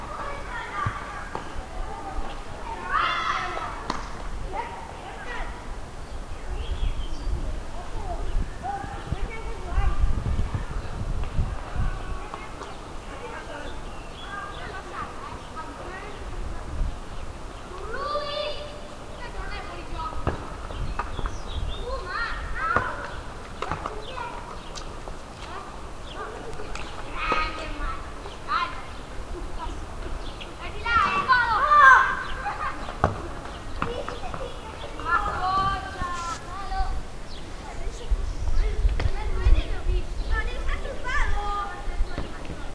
Cinque Terra Boys playing football
Short loop of kids playing football on a cobbled street. Recorded in one of the Cinque Terra villages, April 2009